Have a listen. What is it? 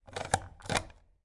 Sound of pressing and relasing self-inking stamp recorded using stereo mid-side technique on Zoom H4n and external DPA 4006 microphone